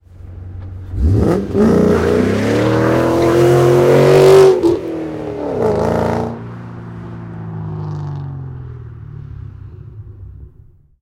Sound of a Mustang GT500. Recorded on the Roland R4 PRO with Sennheiser MKH60.
car, drive, engine, fast, GT500, mkh60, mustang, passing-by, starting, stopping